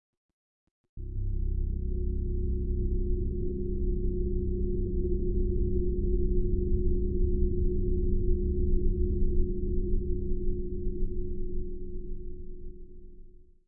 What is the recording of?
Somewhat creepy bass drone made with vst synths. No other processing added.
space, ambient, pad